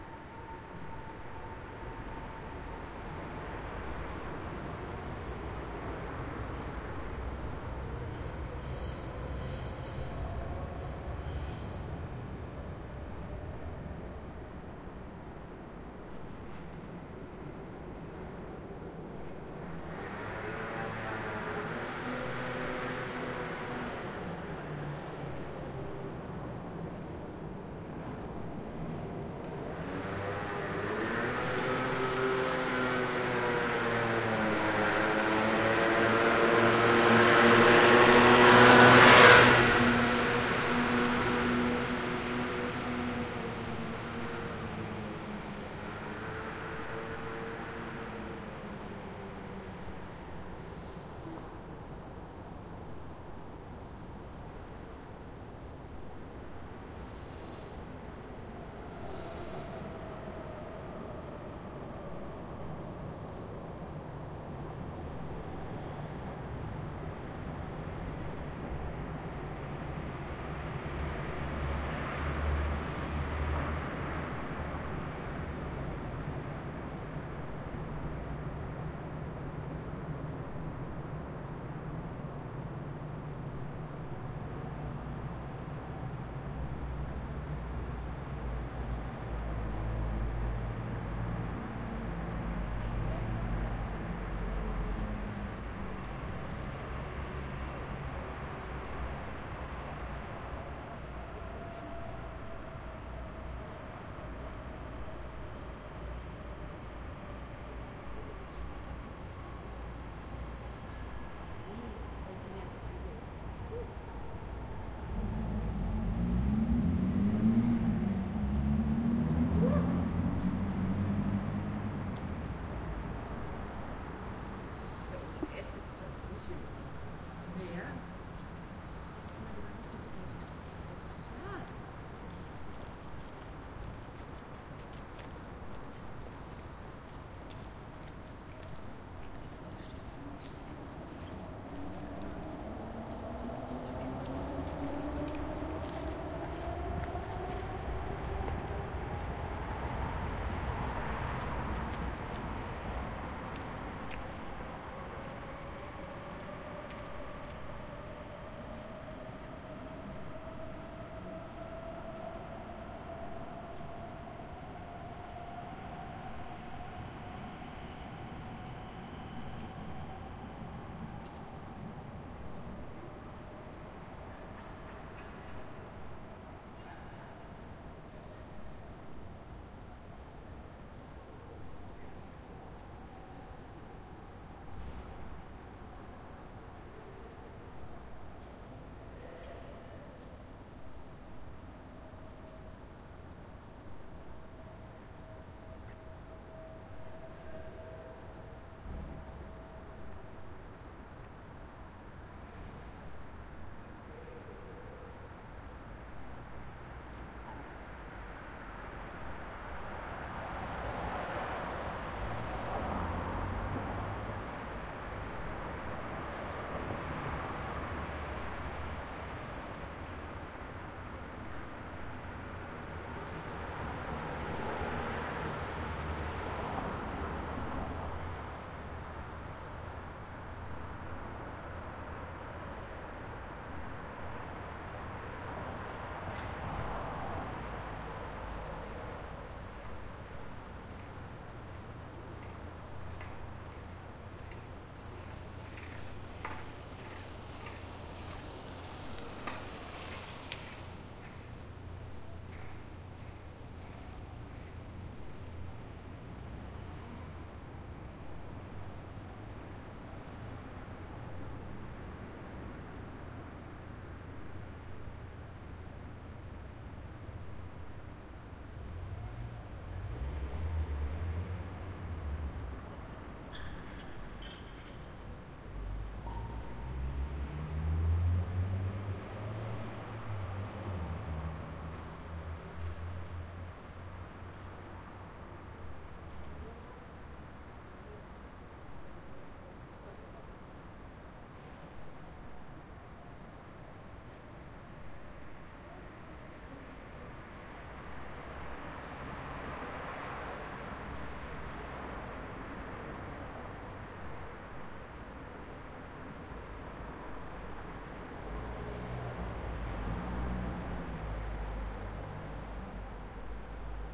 Berlin Street Night Ambience
Berlin night ambience in a side road of the Greifswalder Straße. You hear a train (S-Bahn) driving off, a motor bike passing by, bicycles, people and wind and silence. Mid February 2014.
city, urban, car, berlin, relaxing, people, noise, night-time, silent, ambience, bicycle, sleepy-time, night, quiet, germany, motorbike